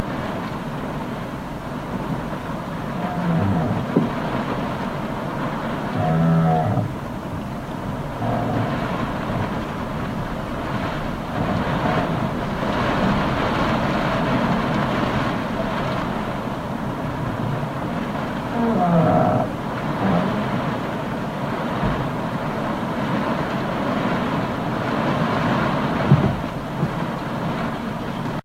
Arizona Regen Leinenknarren
Shot in the bunk of a motorboat at night in the rain. The rain rushes, the ropes creak, the boat hits the jetty once
boat, bunk, rope, rain, field-recording, water